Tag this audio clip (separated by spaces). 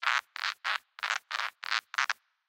Sneaky Bottle Creak Walking Foley Tascam Sneaking Footsteps Steps Stealth Rubbing Sneak Creaking Walk